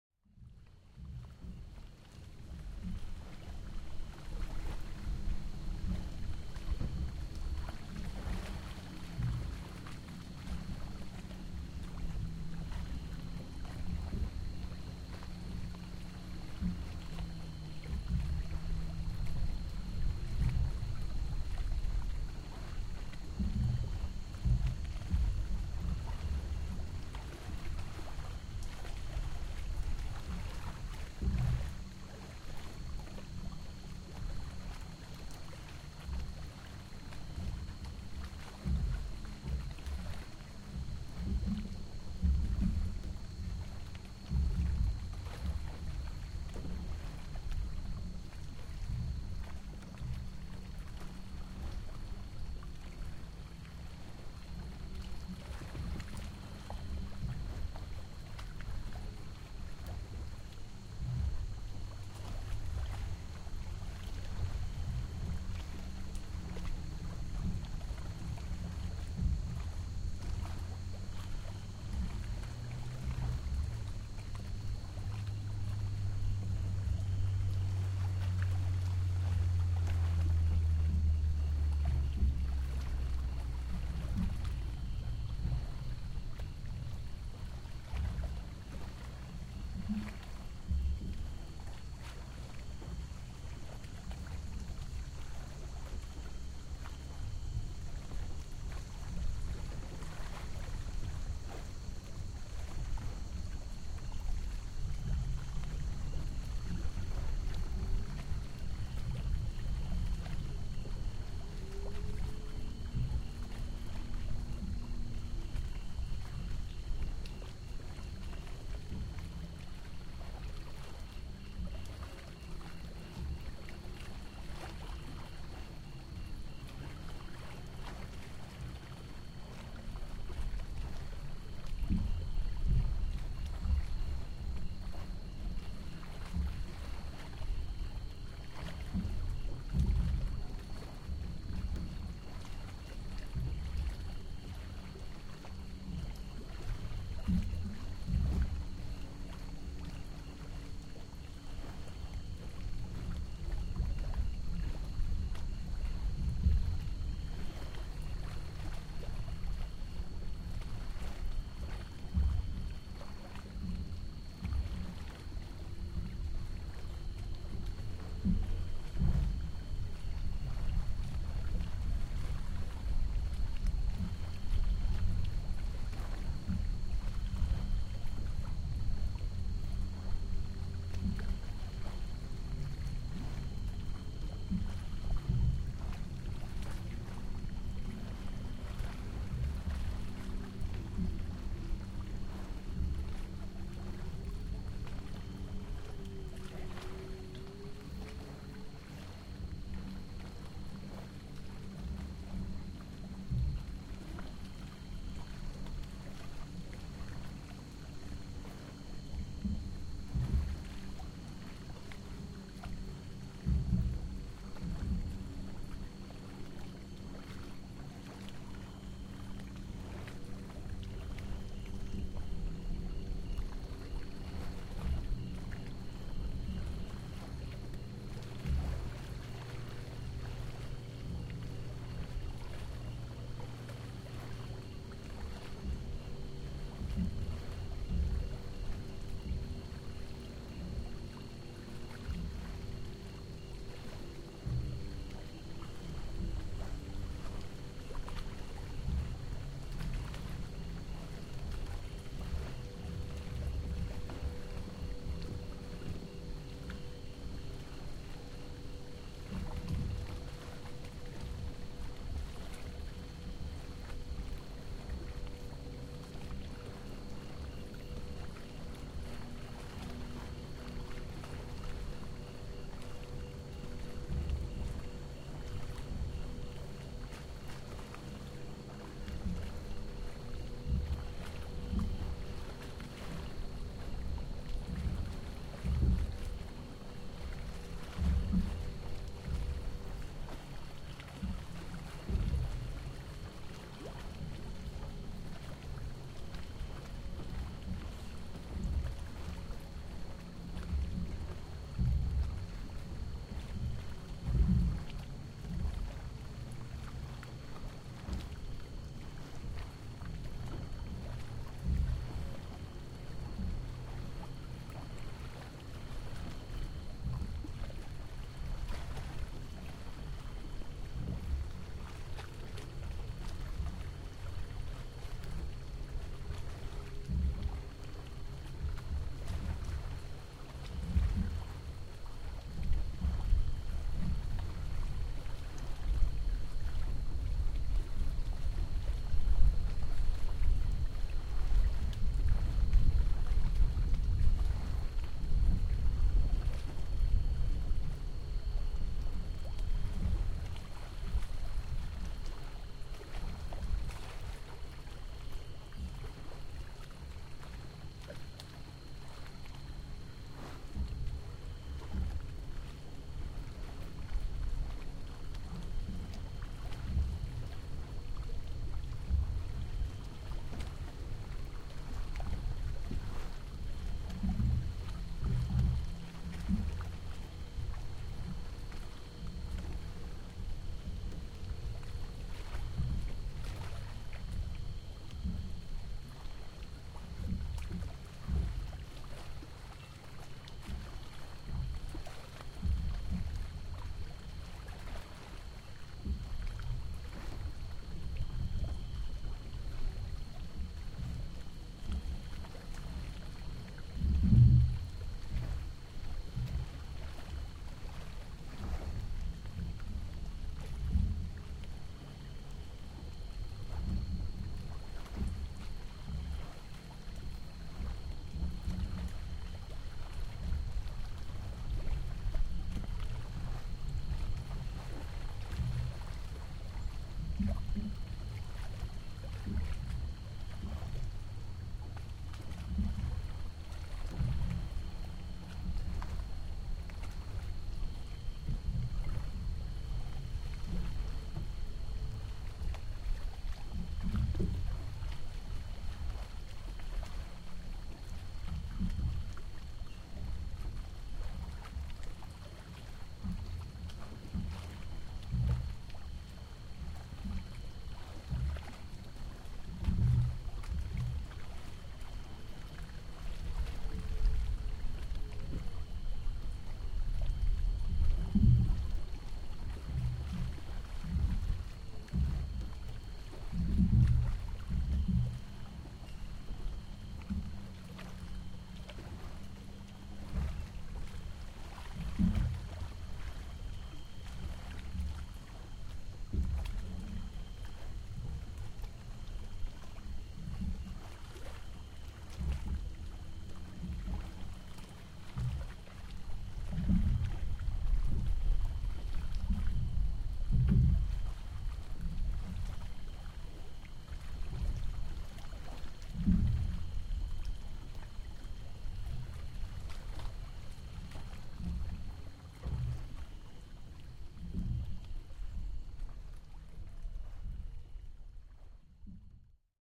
field recording 09/05/2015
ambiance, boat, crickets, dock, field-recording, frogs, lake, nature, pier, water, waves